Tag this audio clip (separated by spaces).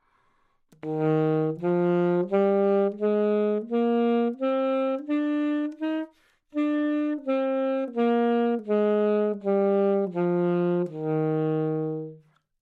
neumann-U87 alto scale good-sounds DsharpMajor sax